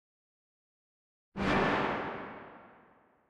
bad things coming
A roomy hit, metal clanging in a dungeon.
anticipation, Evil, hit, premonition, underground